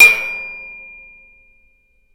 conduit on edge
Large square metal road sign struck into the edge with a 2' piece of metal electrical conduit. Low frequencies come from the sign, high frequencies from the metal conduit. The sort of sound you might hear in "Stomp".
metal, ping, metallic, sheet, percussion, bang, stomp